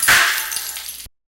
snd box smash splatter
Metal box breaks and small pieces are splattered everywhere.